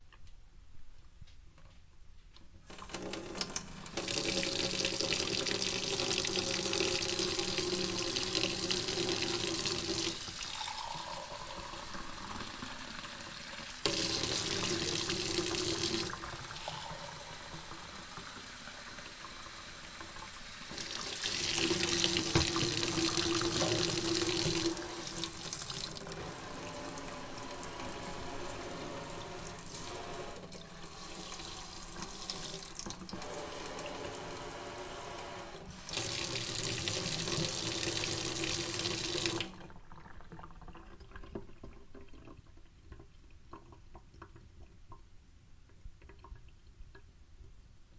Sink Water Running
Water running in a sink and water filling a cup in the sink. Recorded with a Tascam DR-1 and Shure 57 mic dangling over the sink.
sink, water, drain, Running-sink, faucet, kitchen, Dripping, Running-Water, tap, Kitchen-sink